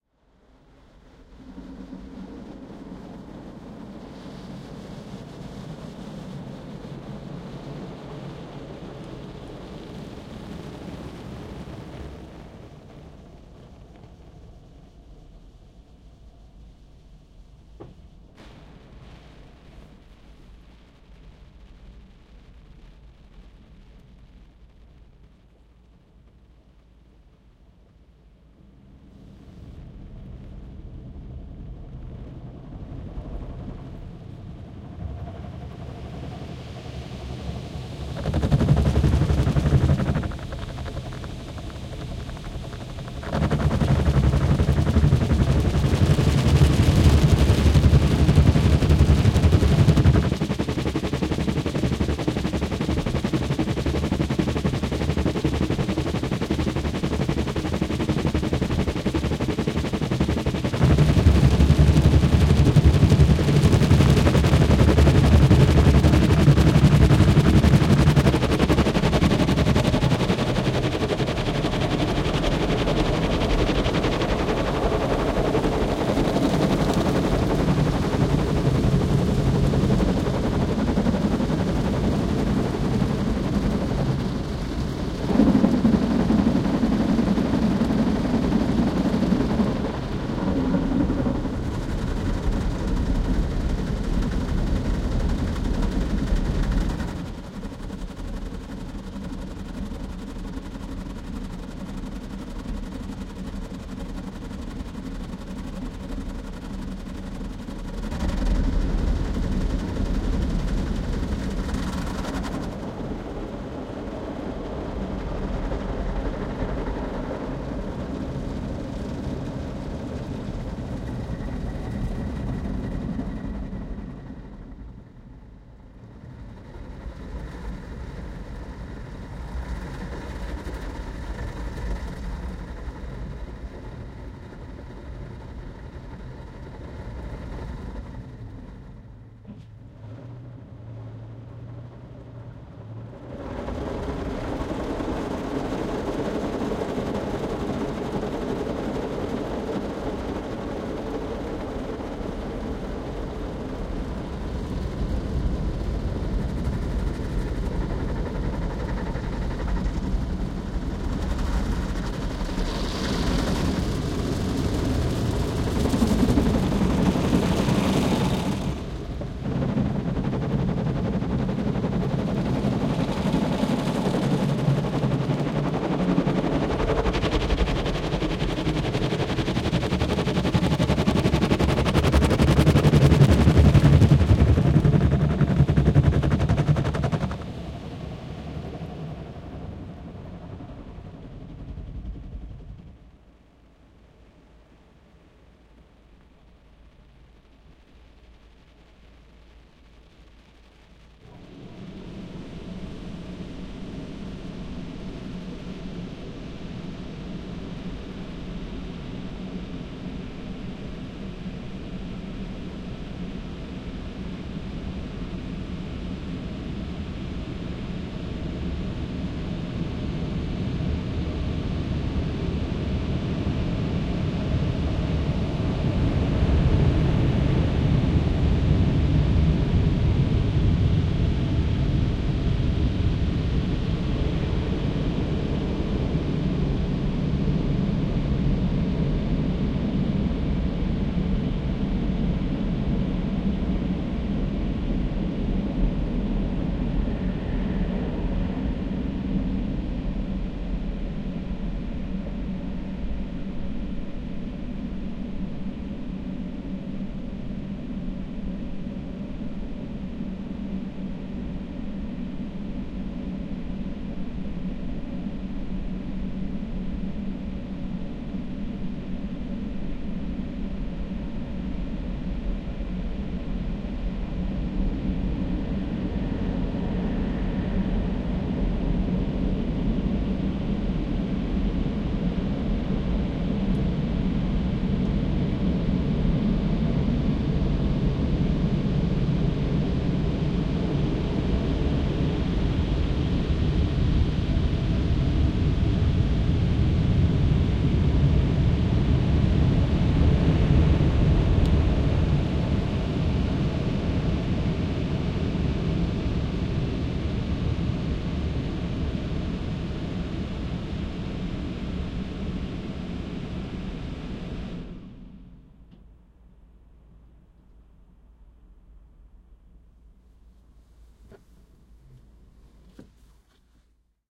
Going through a car wash with two Luhd mics right up against the windscreen, connected to a Zoom H1 recorder.
water, industrial, mechanical, car-wash, carwash, air, machinery